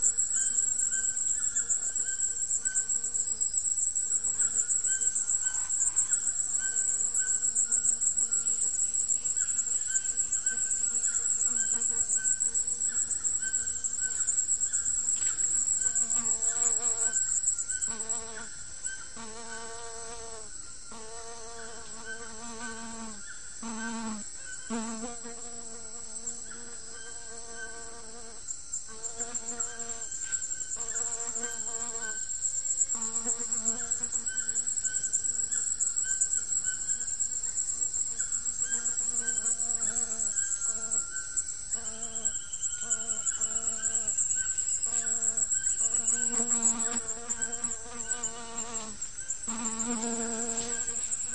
Late afternoon in the amazon rainforest, laying in a hammock in our
survival camp far away from any populated place. A fly is circling
around trying to get through the protection net and have a nice
bloodmeal.Toucans are calling in some distance and lots of insects like
crickets and cicadas build the background chorus.